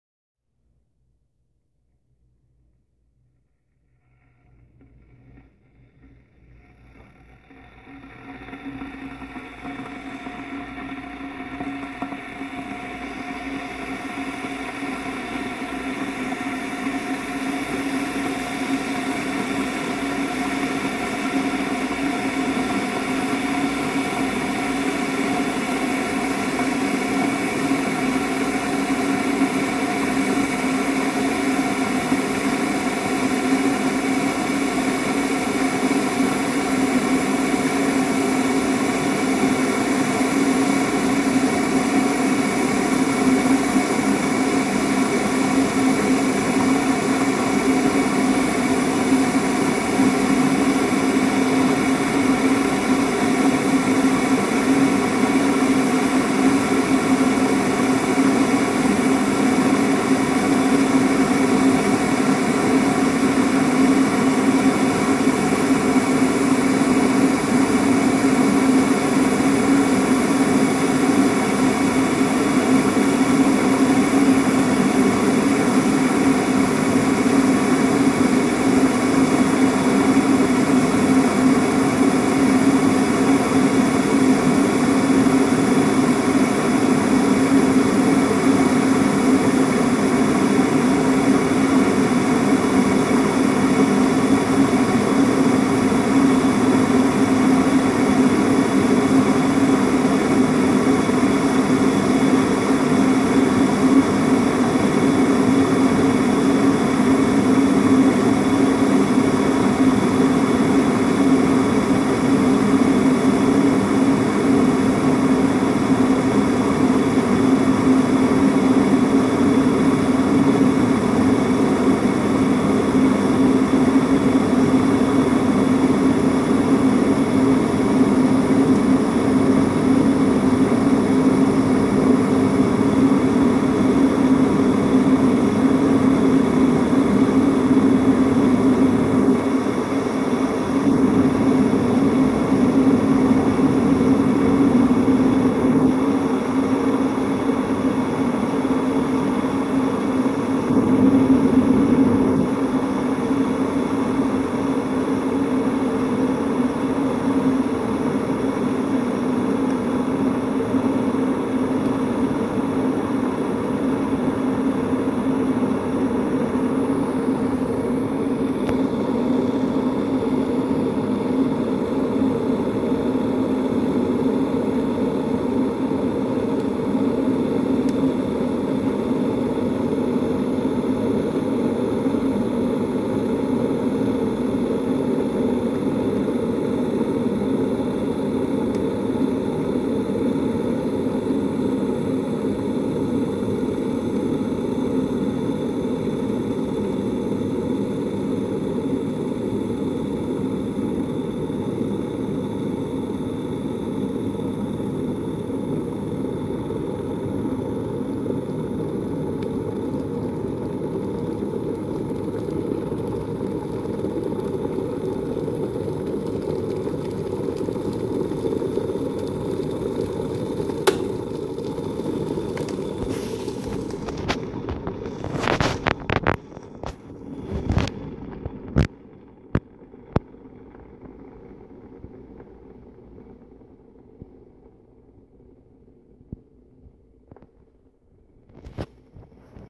Tea Kettle Heating and Boiling
A tea kettle going from heating water to boiling water.
boil, boiling, boiling-water, brewing, heating, kettle, pot, tea